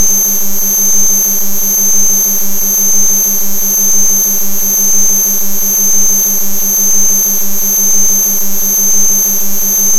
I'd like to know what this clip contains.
A fly in my head
horror, horror-fx, brain, horror-effects